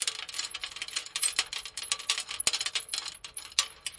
20131202 clattering chain ZoomH2nXY
Recording Device: Zoom H2n with xy-capsule
Low-Cut: yes (80Hz)
Normalized to -1dBFS
Location: Leuphana Universität Lüneburg, Cantine Meadow
Lat: 53.22868436108441
Lon: 10.39854884147644
Date: 2013-12-02, 13:00h
Recorded and edited by: Falko Harriehausen
This recording was created in the framework of the seminar "Soundscape Leuphana (WS13/14)".
xy, Leuphana, trash-can, University, Percussion, Outdoor, Campus, Soundscape-Leuphana